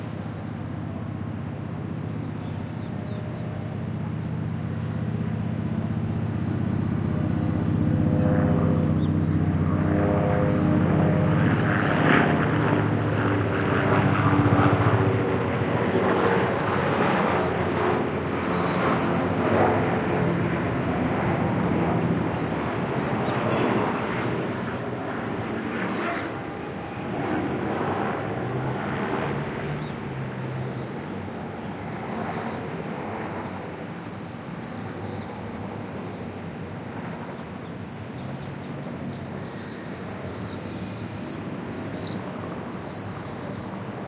an airplane passes + Swift screeching. Senn MKH60+MKH30 into FR2LE